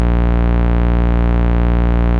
A basic saw waveform from my Micromoog with the full amount of one octave doubling applied. Set the root note to A#2 -14 in your favorite sampler.